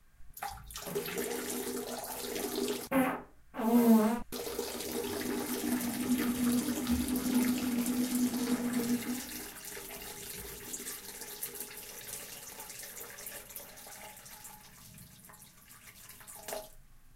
Homemade pee and fart sound
fart, bathroom, pee, Toilet